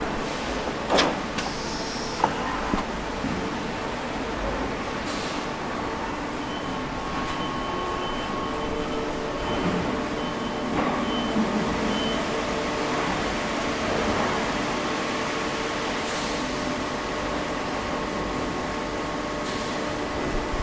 Field recording from Whirlpool factory in Wroclaw Poland. Big machines and soundscapes
aw, Factory, field, machines, Poland, Wroc, Wroclaw